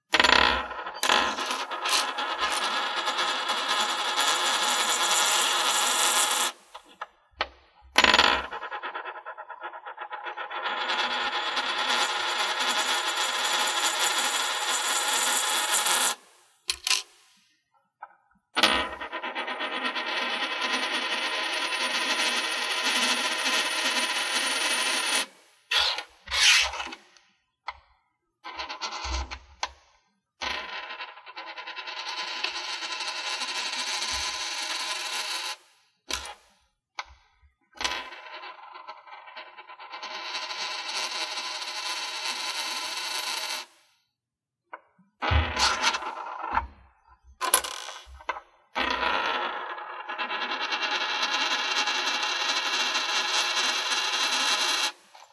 Coin spin
Coins spinning on table
152, ching, Coin, dime, flip, GARCIA, Money, MUS, nickle, Penny, quarter, SAC, spin